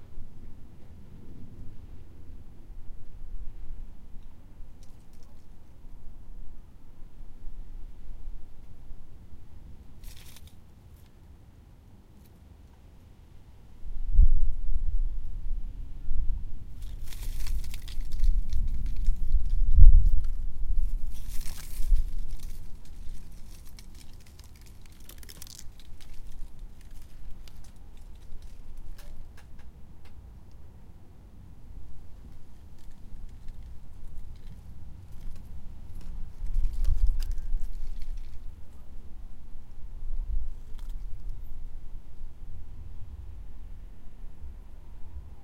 Some nice sounds of very dry-leaves moving and rustling on the pavement.
Wodden garden parasol creaks and also (unfortunately) some wind noise on the microphone.
Recorded with a Zoom H1 on 21 Jul 2016.